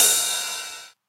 Sampled by Janne G:son Berg from his old 909. Cut up and organized by me.
Sampled in one session from my (now sold) 909.
/Janne G:son Berg 2005